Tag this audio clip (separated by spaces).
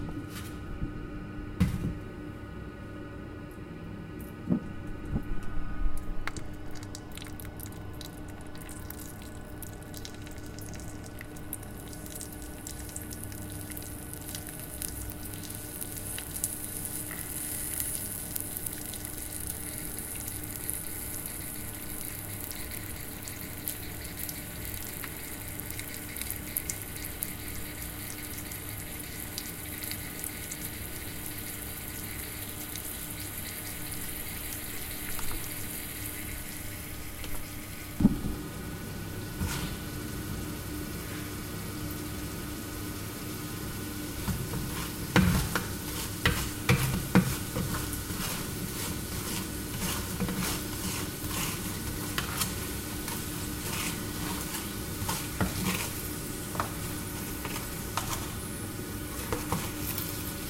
stereo zoom h4n